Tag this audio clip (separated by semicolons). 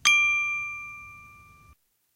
Motion-Graphic; Professional; Clean